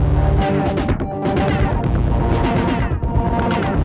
MR Darklectro 07
Very odd lofi analog rhythm loop with analog distorted synths.
Analog, Crunchy, LoFi, MR10, Rare, Raw